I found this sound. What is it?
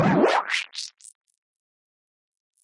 processed white noise
noise, sound-design, white